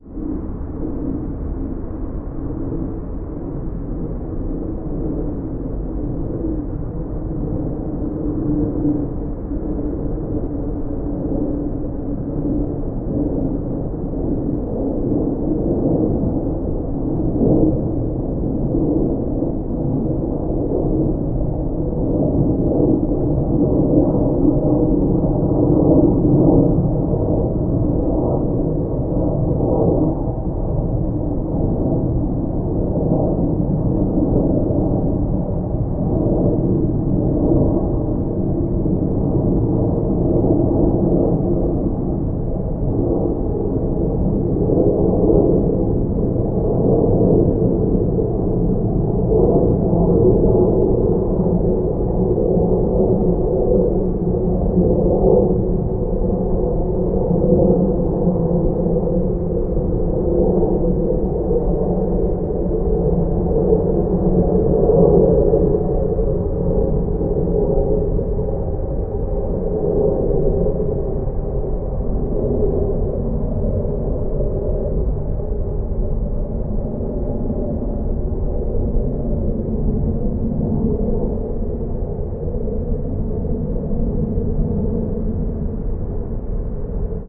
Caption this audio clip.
The sound of a passing airplane at its normal height (8 miles or so), recorded at night, when there were no other sounds.